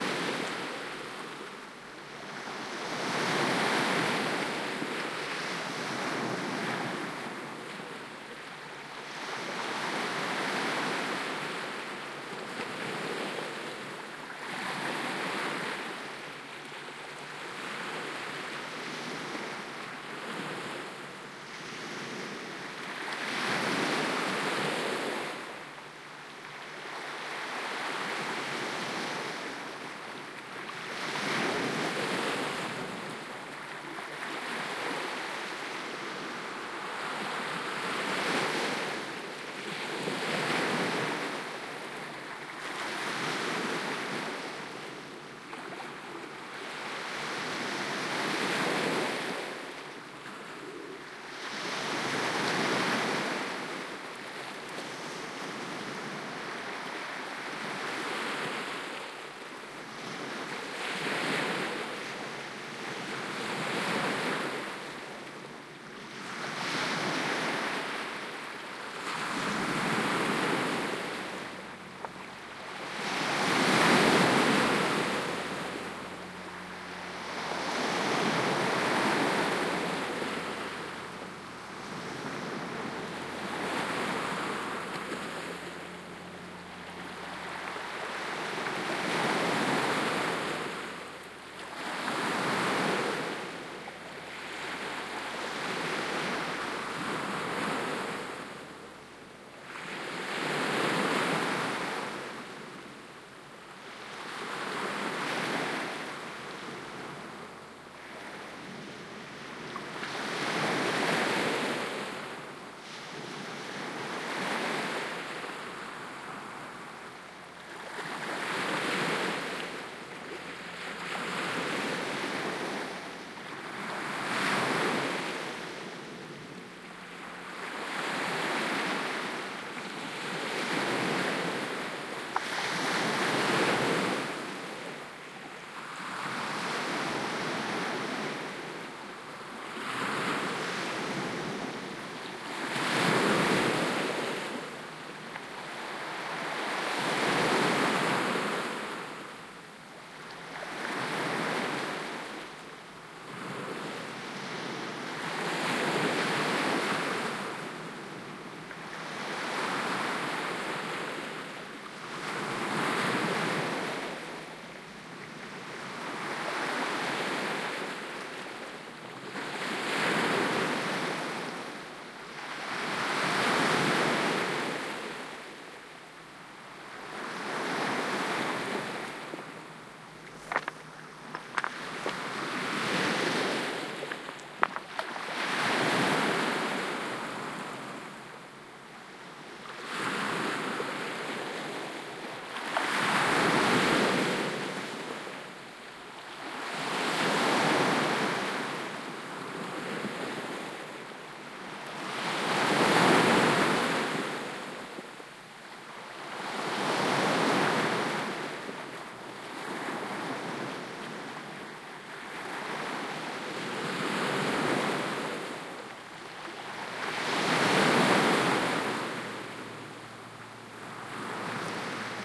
Ocean-waves-binaural-02
Ocean waves
OKM II binaural capsules
ZoomH5
Senheiser MKE600